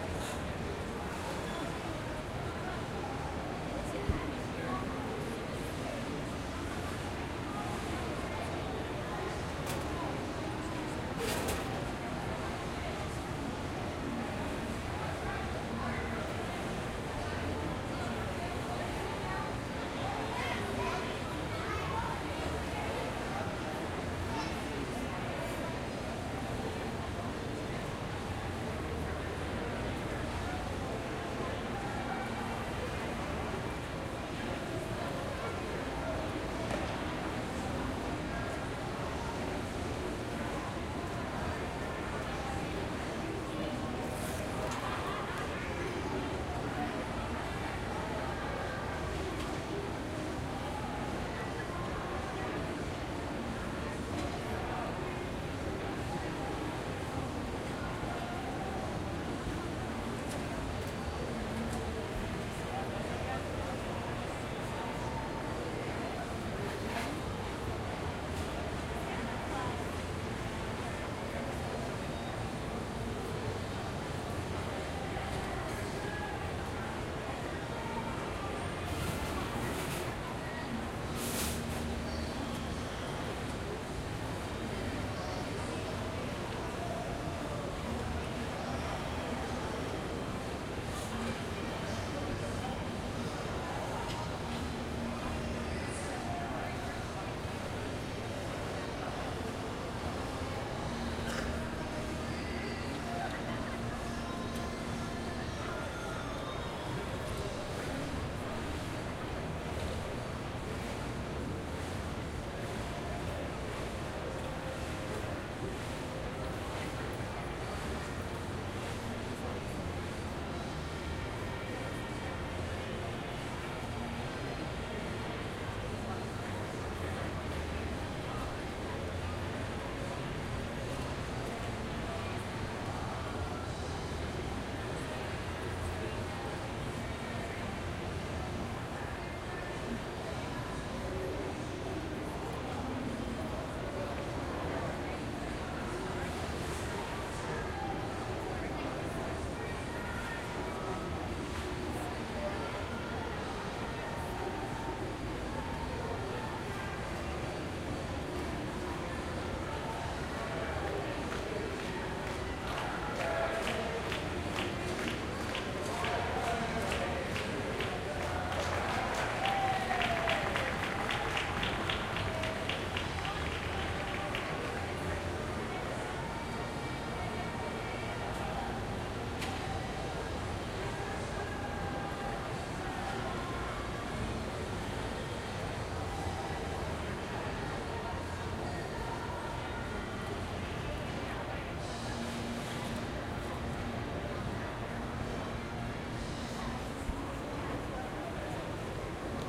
A recording of an open-top shopping center at night.